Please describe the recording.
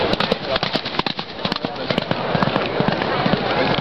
Horse Galopp Race Pferderennen 01
Recorded during horse racing in Krefeld (Ger) 2009
horse, gallopp, horse-racing